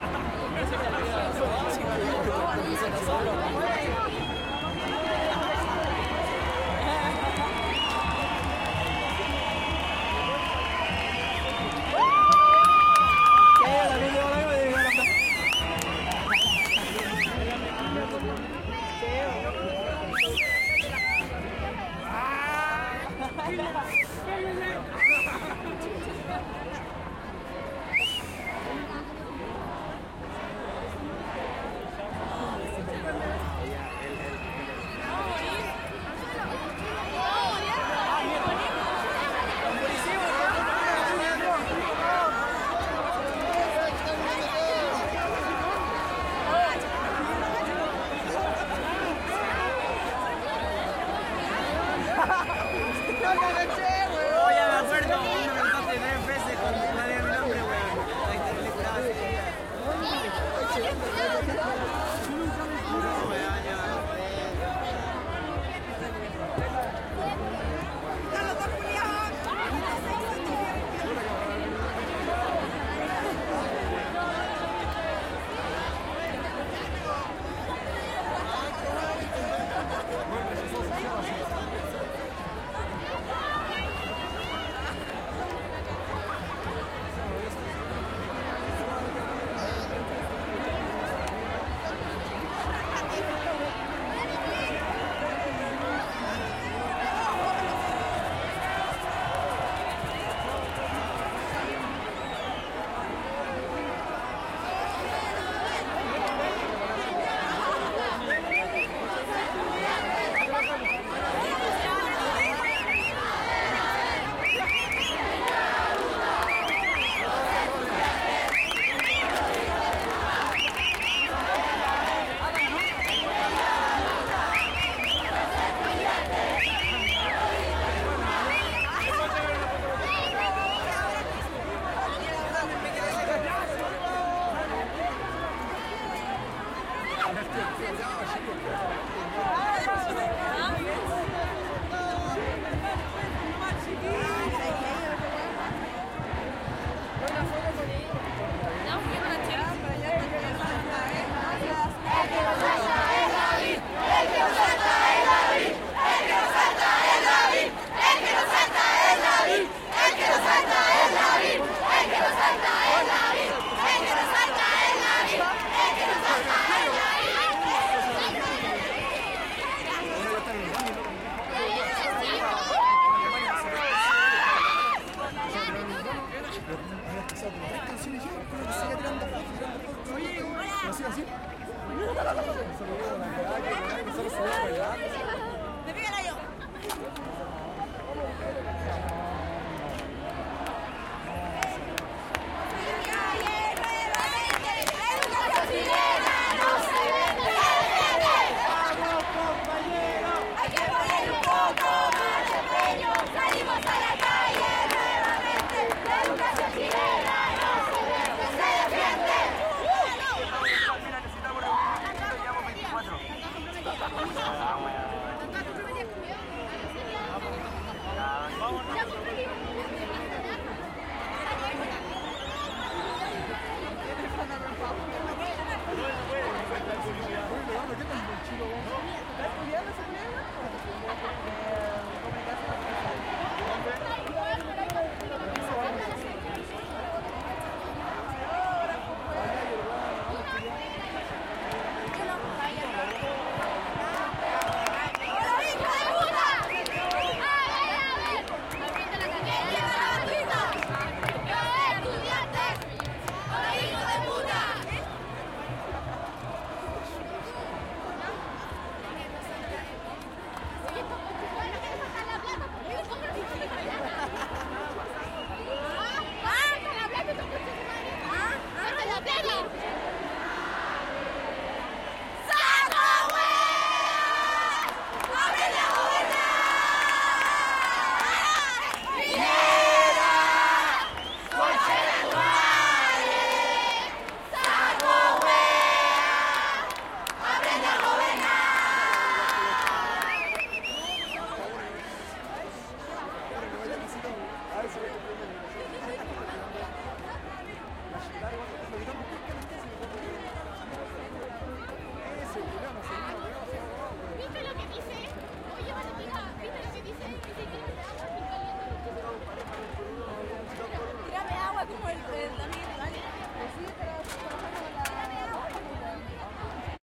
besaton por la educacion 06 - canticos varios
Besatón por la educación chilena, Plaza de Armas, Santiago de Chile, 6 de Julio 2011.
Cánticos varios.
estudiantes, plaza, besaton, armas, crowd, chile